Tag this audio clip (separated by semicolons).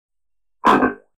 notification; phone; text